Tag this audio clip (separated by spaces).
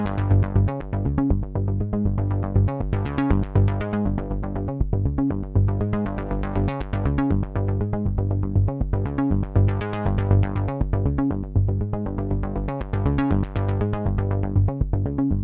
Analog Sequencer Tetra